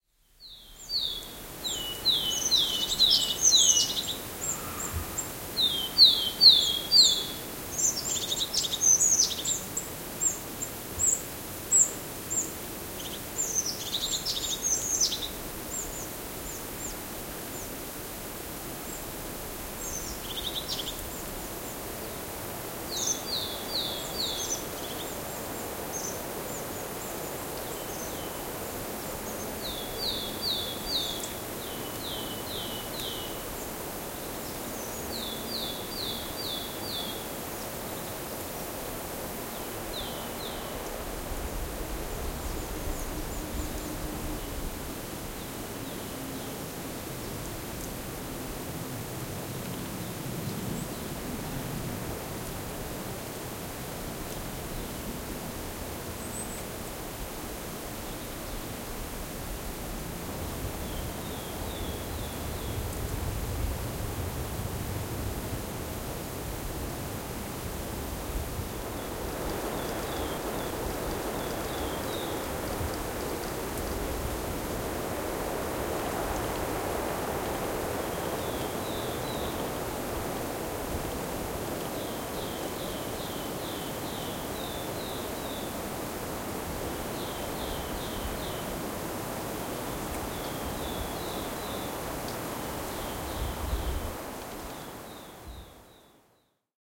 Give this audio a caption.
Metsä, kevät, huhtikuu, lintuja / Forest in early spring, april, trees whispering in the wind, rustling, birds, tits, a willow tit
Alussa tiaisia lähellä (hömötiainen), sitten vähän lintuja kauempana ja pientä rapinaa. Tuuli humisee puissa.
Paikka/Place: Suomi / Finland / Vihti, Haapakylä
Aika/Date: 09.04.1976